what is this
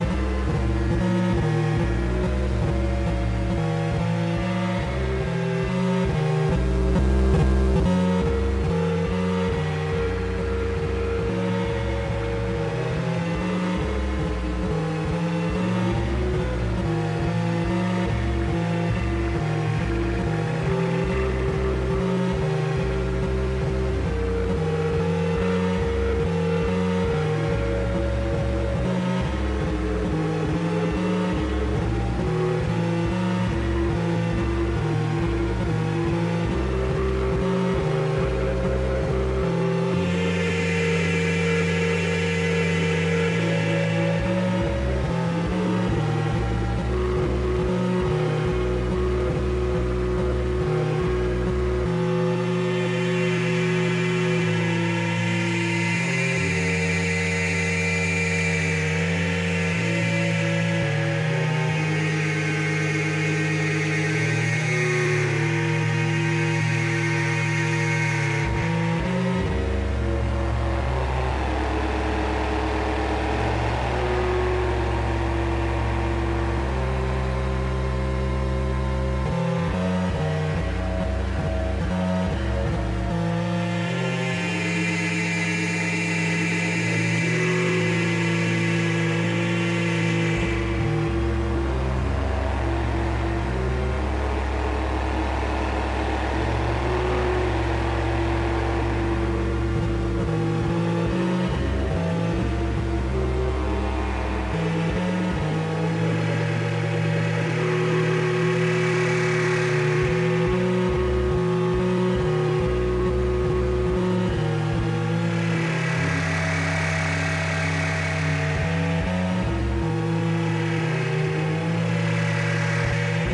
Bit of Miles Davis´inspired by "Stuff". 0-Ctrl sequences Strega and 0-Coast. Enjoy!